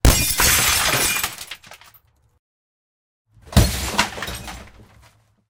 window small trailer rv glass smash with an axe debris fall metal grill close and nearby bg
axe, close, debris, fall, glass, grill, metal, nearby, rv, small, smash, trailer, window